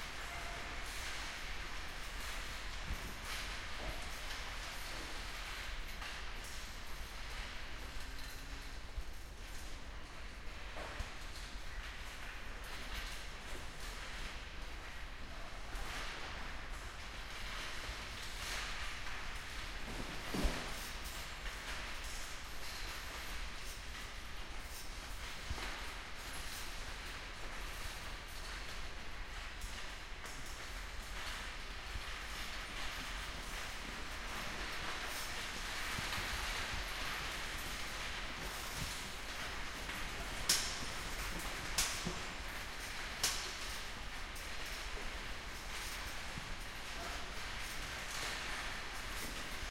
Giant Covered Scaffold Devon UK Interior sel
An entire country mansion was covered over with scaffold and sheeting to keep the rain off. Visitors were allowed to climb up the (many) metal stairs to an observation platform. I asked the helpful guide to be quiet whilst I recorded this odd environment! He obliged.
Zoom H1 recorder.
creak, scaffold, gusts, Wind, clank, sheets